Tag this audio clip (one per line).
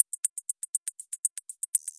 loop
electronic